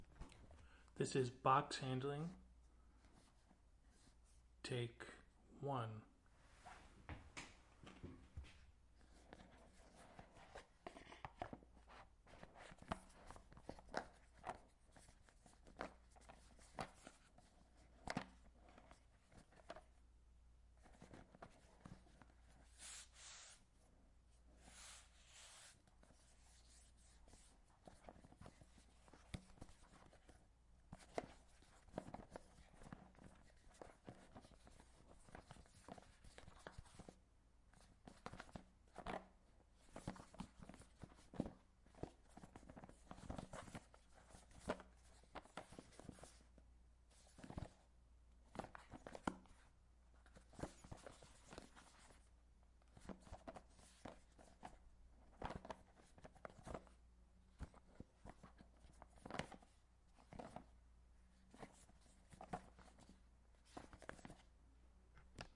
FOLEY Small box handling 1
What It Is:
Me handling an iPhone box.
A young girl handling a birthday gift box.
AudioDramaHub, birthday, cardboard, foley